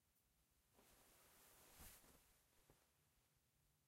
Pushing through curtain or cloth 1
Passing through a curtain or cloth. recorded with a Roland R-05
cloth, fabric, friction